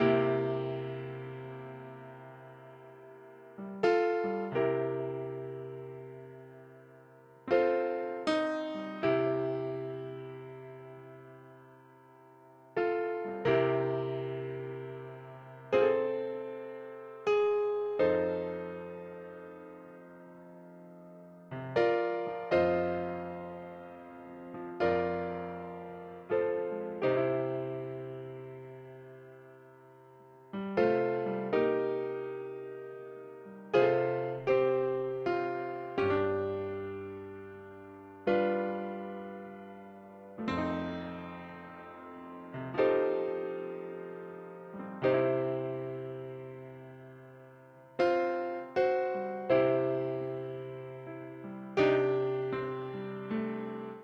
Song6 PIANO Do 3:4 80bpms
loop, rythm, Do, blues, bpm, Chord, beat, HearHear, 80, Piano